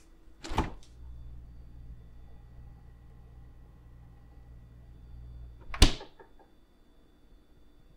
fridge door open 01
opening a fridge door
door, fridge, open